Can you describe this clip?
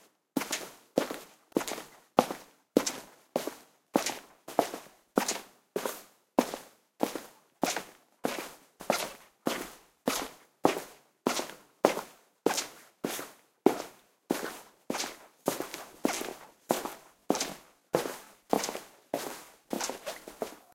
Footsteps In Squeaky Shoes
Footsteps recorded by TASCAM DR-100; edited in Sound Forge.
footstep, squeaky, shoe